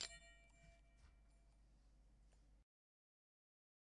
Heatsink Small - 32 - Audio - Audio 32
Various samples of a large and small heatsink being hit. Some computer noise and appended silences (due to a batch export).